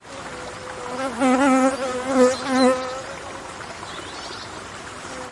20190628.bee.near.stream.108
Very short take of the buzzing of fast-flying bee, with babbling stream and bird tweets in background. EM172 Matched Stereo Pair (Clippy XLR, by FEL Communications Ltd) into Sound Devices Mixpre-3. Recorded near Ermita de Santa Cecilia, Vallespinoso de Aguilar (Palencia Province, N Spain)
birds, river